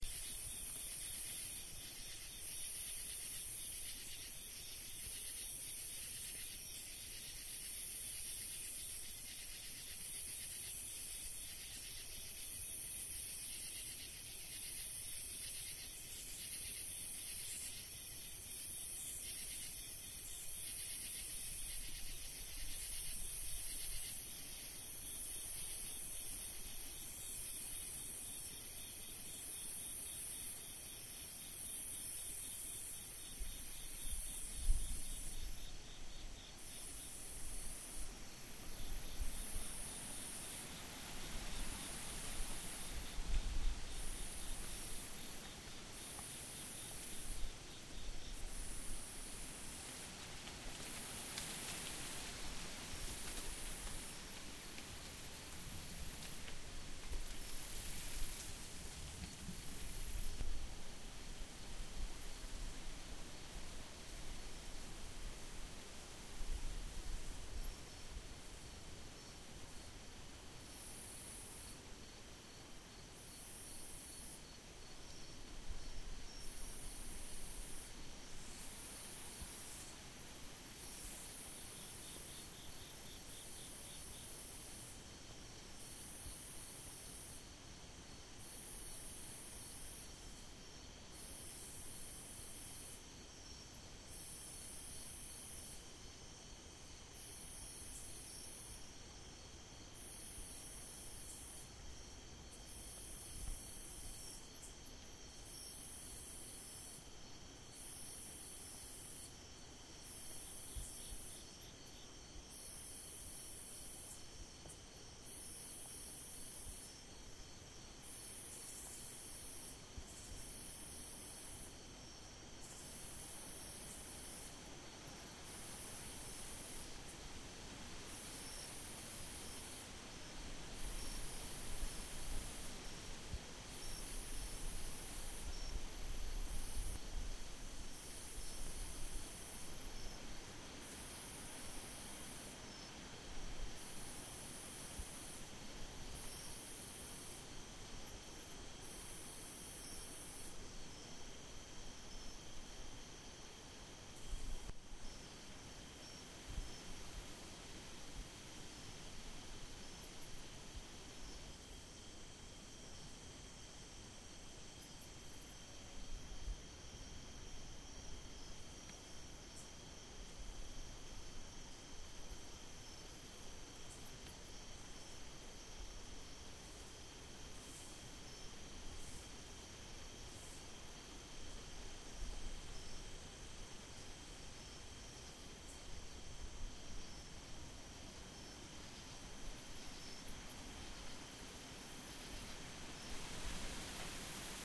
Stereo recording: hot afternoon in the summertime, recorded outside.